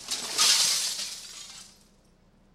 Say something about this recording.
A bucket of broken glass tipped out above a 1m drop. As recorded.